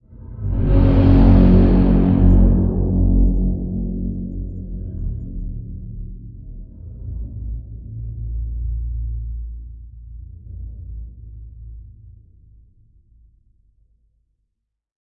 Hell's Foundations C
What would hell's foundation's sound like ?